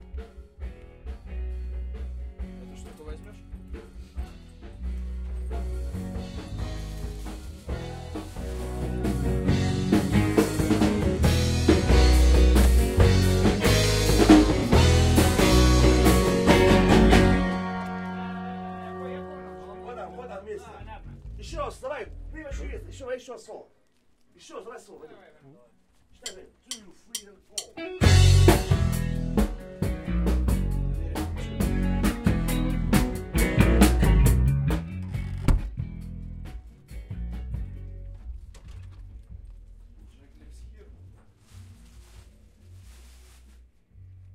walk through repetition room
Walking through the repetition of music band.
Open first door, go into repetition room, close door, walking through room between musicians, open second door, go into chillout, close second door.
Recorded: 2014-03-07.
Recorder: Tascam DR-40.